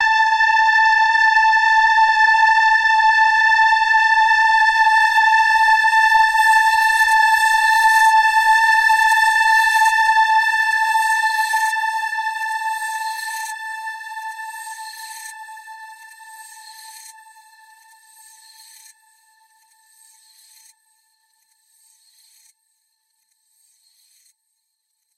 A very dark and brooding multi-sampled synth pad. Evolving and spacey. Each file is named with the root note you should use in a sampler.
synth, multisample, granular, multi-sample, ambient, dark